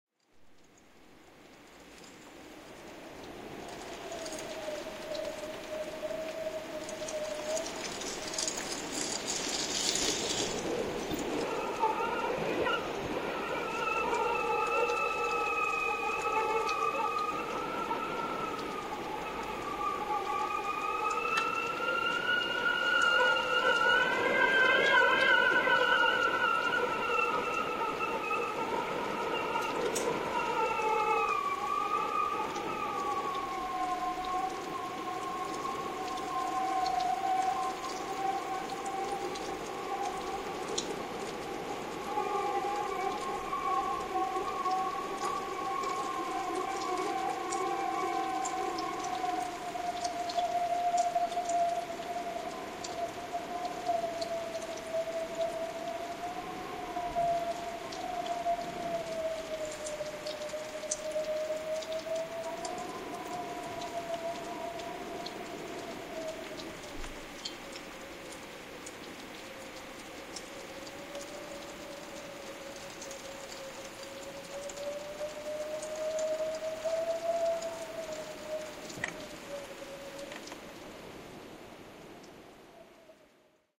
Scary Rain
A calm rain, with scary winds.
calm, rain, scary, shower, winds